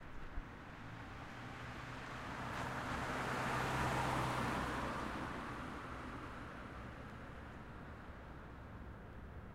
Vorbeifahrendes Auto links nach rechts
Ein von links nach rechts vorbeifahrendes Auto. / A car moving from left to right.